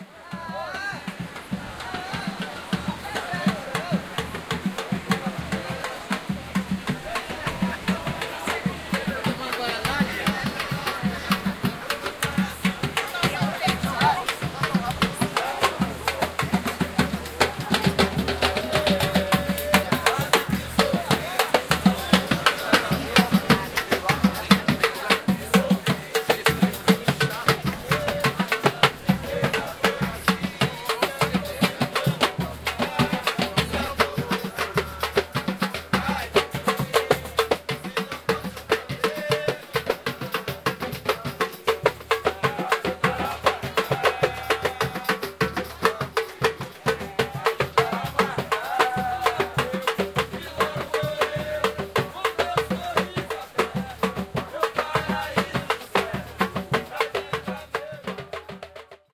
Samba on the beach
A group of three or four young people on the beach of Itaparica, Bahia, Brazil, trying to get some money from the tourists by drumming and singing a type of samba from Bahia, so-called samba duro. Binaural OKM, Sony Datrecorder.
beach, brazil, drums, field-recording, people, seaside